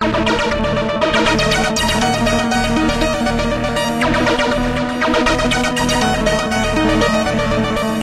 BAS-23022014 3 - Game Loop 5
Game Loops 1
You may use these loops freely if
you think they're usefull.
I made them in Nanostudio with the Eden's synths
(Loops also are very easy to make in nanostudio (=Freeware!))
I edited the mixdown afterwards with oceanaudio,
;normalise effect for maximum DB.
If you want to use them for any production or whatever
23-02-2014
game, loop, music